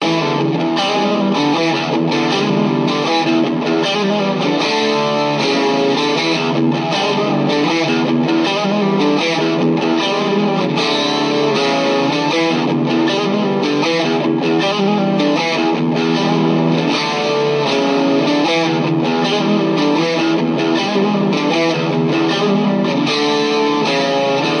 Funky electric guitar riff